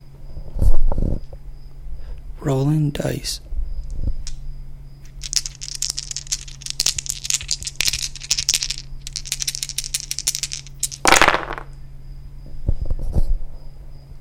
Shaking and rolling dice
Rolling and then shaking 3 dice in my hand. Recorded with a condenser mic.